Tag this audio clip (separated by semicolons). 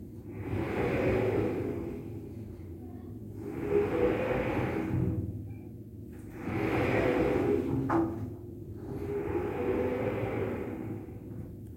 Close; Closing; Creak; Creaking; Creepy; Door; Dr; Gate; Horror; Household; Old; Open; Opening; Sci-fy; Squeak; Squeaking; Tardis; Who; Wood; Wooden